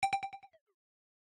strange notification
Sonido de notificación o efecto de sonido hecho por mi
notification, sound-effect